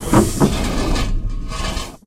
Large containment chamber opening to reveal the sound of molten liquid before closing again. Created for a game built in the IDGA 48 hour game making competition. Original sound sources: water boiling (pitched down and heavily filtered), running (pitched down and heavily filtered) rocks scraping together, bricks and pieces of metal being scraped across concrete. Samples recorded using a pair of Behringer C2's and a Rode NT2g into a PMD660.
game, molten, smelter, liquid